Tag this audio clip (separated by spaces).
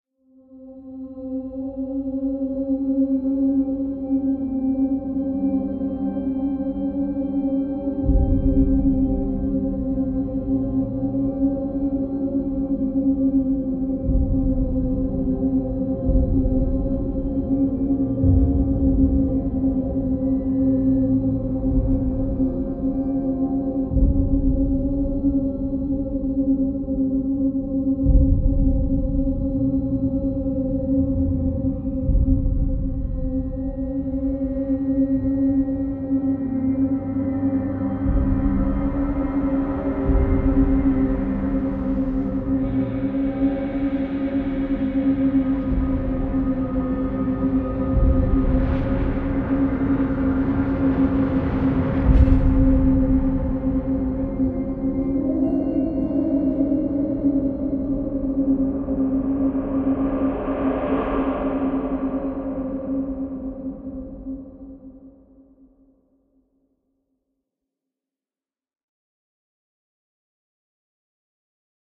door terrifying ambient owl scary